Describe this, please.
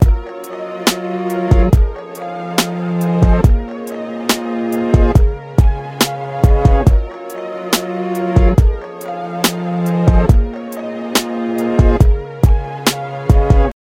Feel the Beat (Loop)
Want an idea to start with? Try this beat.
electronic, beat, loop